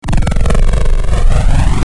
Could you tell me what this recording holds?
Electro Static
This is an electric discharge from an electron based energy rifle. Meant for use in SciFi game development.